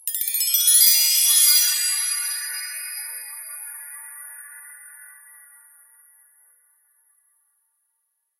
Magic wand sound made for theatre. Used Cubase and Reason.
fairy, Magic, tale, wand, synth